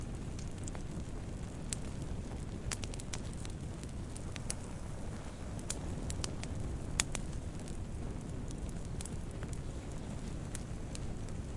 Ambiance Fire Loop Stereo
Sound of Fire. Loop (12sc).
Gears: Tascam DR-05
Camp, Field-recorder, Fire, Loop, Short, Tascam